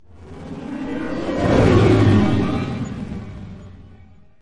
ship flyby 1
Starship flying by. made in fl studio.